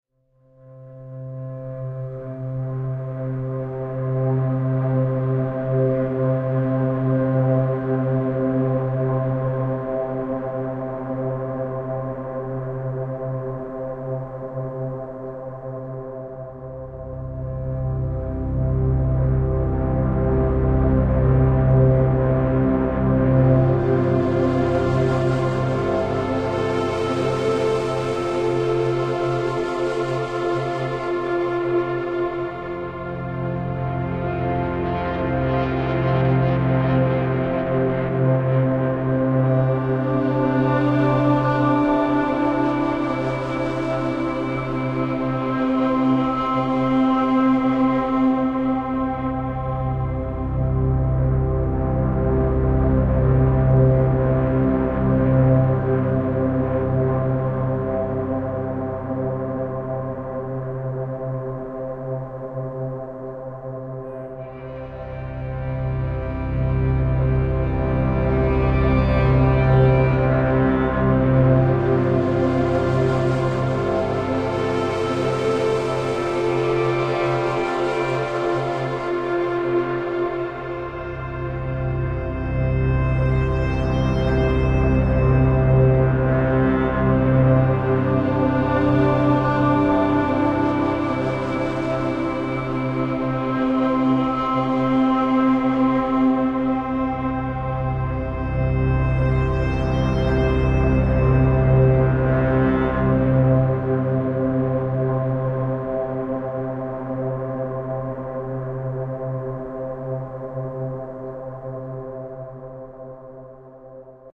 Lonesome Angel
Extremely sad and sentimental drama music
UPDATE: Thank you for over 50 downloads. Let's see if we can make it 100
ambient, chillout, drama, emotional, flute, melancholic, music, new-age, relaxing, sad, sentimental, sleep, soundtrack, synthesizer, underscore, violin